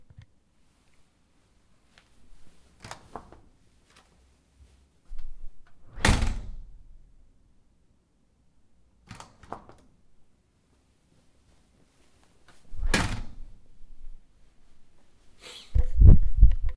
Door opening and closing.
A sound i made for a short film. Pretty clean. Made with Zoom 4hn
clean, close, Door, field-recording, Movement, open